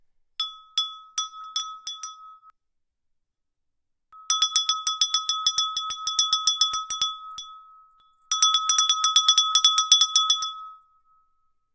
Ceramic Bell - Copy
A small ceramic diner bell ringing repeatedly. The sound was recorded with a Shure SM81 microphone and an Edirol R44 Recorder.